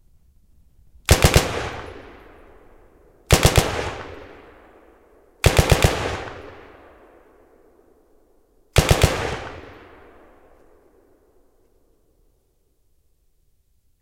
Maxim Russian machinegun very near
Maxim, russian 2nd world war powerful machine gun, very near, distance to gun about 1 to 10 meters. If you listen closely, you might hear shells hitting pavement. Recorded with 6 microphones, via Fostex ADAT.
For curiosity, if I mention something about gun, when recording this sound, I stood about 10 meters from gun, and still airpressure shake my trousers.